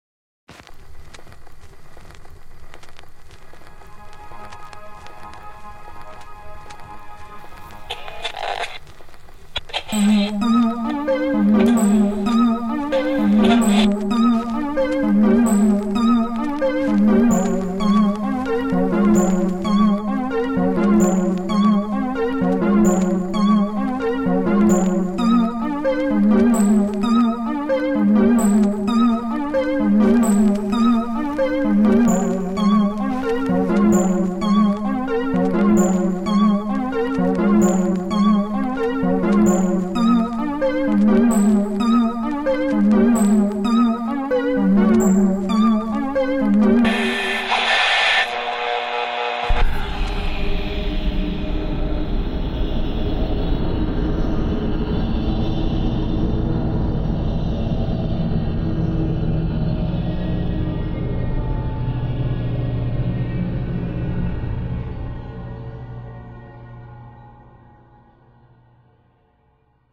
the bear
star, future, sounds, radio, SUN, space, wave